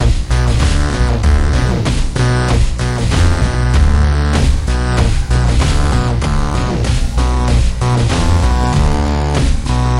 dj4realandmrrobotjamforloop1
created with drum by dj4real and bass by mrrobot from looperman. 96 bpm, key of b loop
drum, b, 96, bass, loop